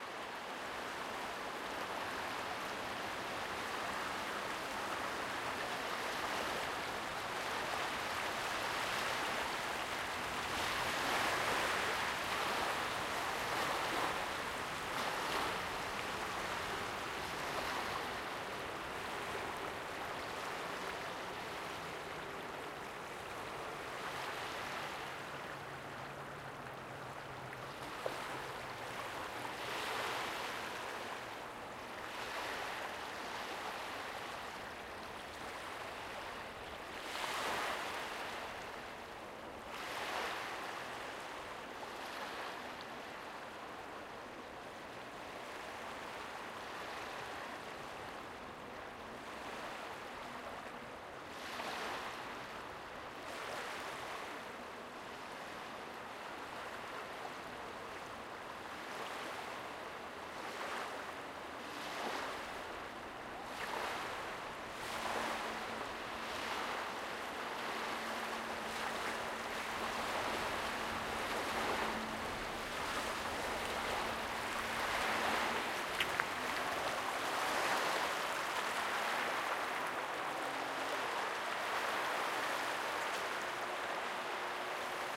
River in a city (Rhine, Duesseldorf)
At the beach of the river Rhine in Duesseldorf, Germany. Some background noise of the city.
beach, field-recording, flow, river, water, waves